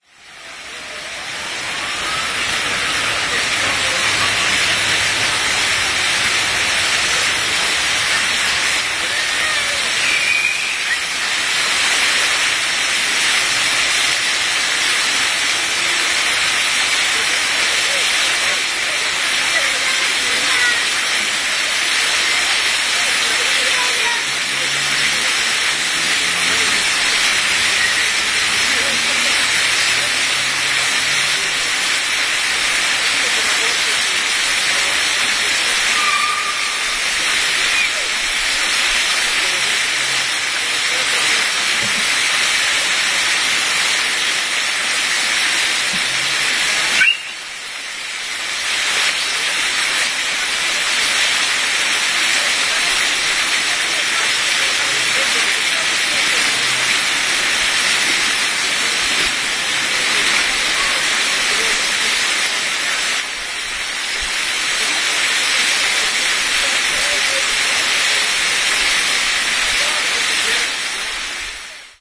20.08.09, about 8.00 a.m. Green square on the corner Zielona, Dluga, Strzelecka streets. In the middle there is a fountain where children are taking a dip.
children, park, voices, water, splash